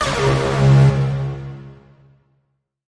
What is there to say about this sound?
laser-shock-1
Sounds used in the game "Unknown Invaders".